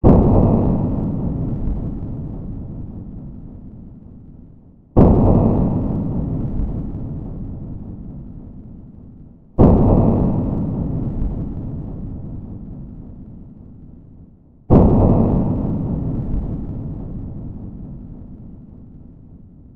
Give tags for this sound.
Ambient Drums

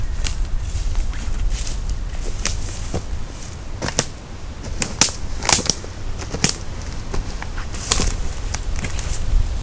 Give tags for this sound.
sticks walking

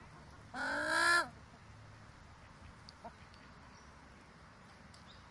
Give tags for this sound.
wood-duck bird duck

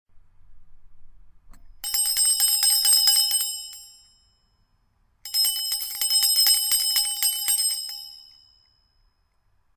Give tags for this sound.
Bell ring ringing

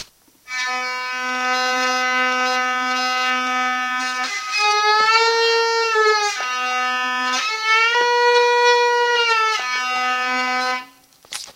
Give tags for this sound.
hurdy-gurdy ghironda vielle-a-roue

